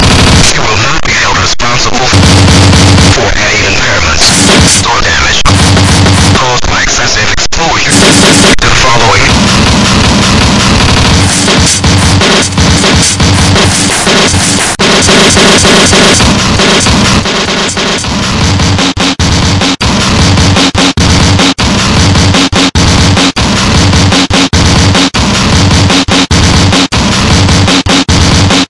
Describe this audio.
Flowerbreak Example
Cut from a new song I'm working on.